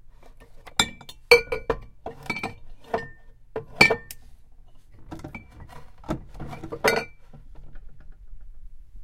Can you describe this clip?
Liquor bottles clinking against each other.
Bottles, clanging, clinking, glass